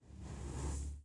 18.Roce con nieve
roce con la nieve
college, practice, recording